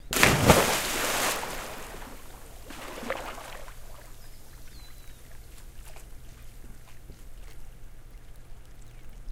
POOL CANONBALL DIVE 1
-Canon-ball into backyard pool
canonball, canon-ball, pool, waves, splashing, canon, swimming, dive, splash, swim, diving, water